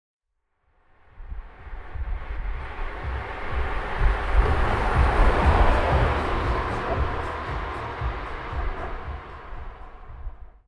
Tuning car bass techno A84
a car with loud music inside, the windows of the car are closed.
it's very short but intense.